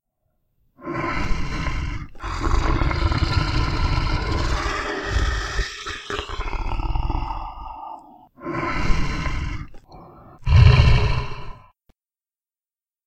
Angry Beast
Recording of myself growling. Applied pitch bend, delay, speed and noise reduction. Recorded with AT2020 mic and Coexant HD Audio, processed using Audacity.
bear, angry, scary, animal, tiger, Angry-beast, beast, lion, monster, angry-monster, growling, deep, growl, big-angry-monster